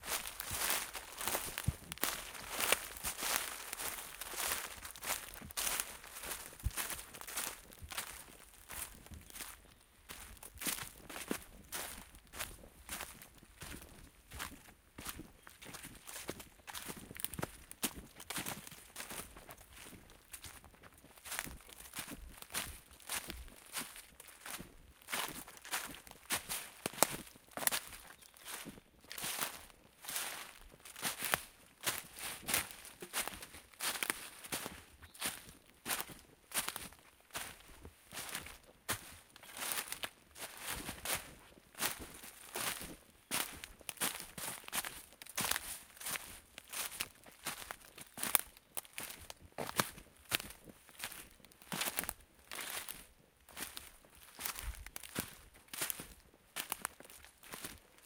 Steps on leaf
Walking on leaf (mostly laurel trees) in a forest on Tenerife, Spain in December. Recorded with an Olympus LS-12 and a Rycote windshield.
footstep, forest, shoe, walk